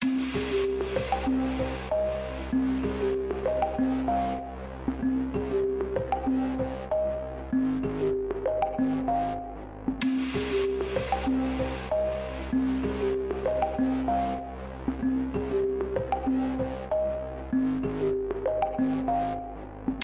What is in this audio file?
Downtempo made with Magix Music Maker
background, gaming, intro, music, podcast